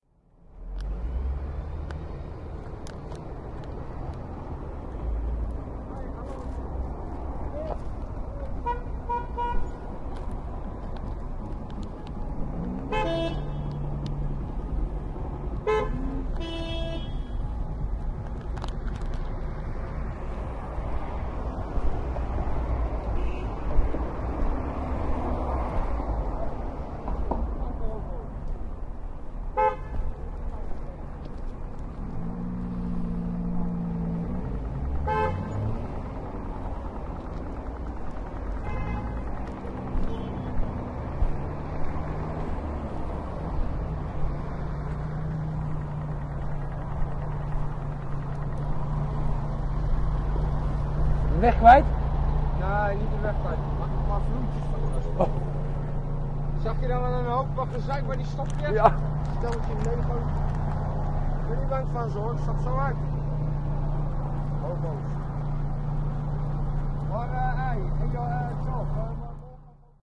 trafic light
A few cars are waiting at the traffic light on the other side of the road while I am rolling a cigarette. I have my Edirol-R09 in the inside pocket of my jacket, recording everything. One of the drivers is in terrible need of cigarette paper and tries to get my attention (in dutch: "he, hallo, meneer!"). The traffic light turns green, this driver still waiting for me. The drivers in the other cars waiting behind him become annoyed and start to honk. When finally all the cars have passed this car waiting for me, it makes a U turn to my side of the road. I ask the driver if he's lost (In dutch: "de weg kwijt?") and the driver replies: "no, not lost, can I have some cigarette paper, please" (In dutch: nee, niet de weg kwijt, mag ik een paar vloetjes van u alstublieft?). It ends with him grumbling about these honking other drivers and then he continues the conversation he was already having with his cellphone.